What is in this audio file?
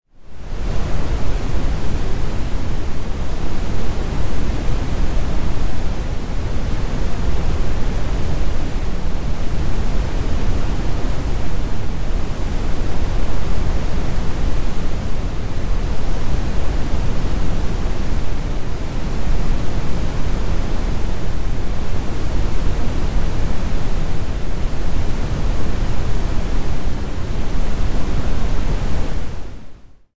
Binaural noise that tickles the brain
Listen in headphones for full brain tickle effect. Generated and processed in Adobe Audition.
binaural, tickle, brain, headphones, ears, stereo, noise